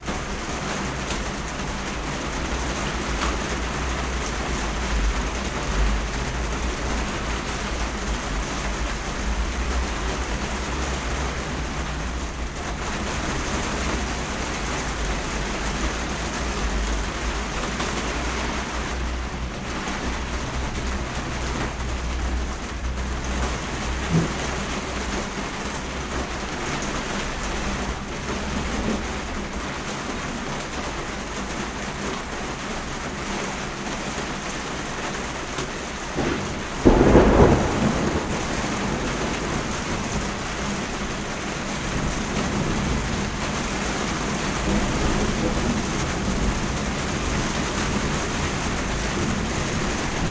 Rain inroom
field-recording, roof, rain, in-room, under